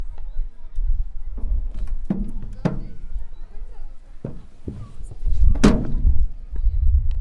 Slip steps 2
Sonido de una persona ascendiendo por las gradas de una resbaladera